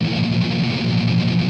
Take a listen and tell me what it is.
dis muted A# guitar
Recording of muted strumming on power chord A#. On a les paul set to bridge pickup in drop D tuneing. With intended distortion. Recorded with Edirol DA2496 with Hi-z input.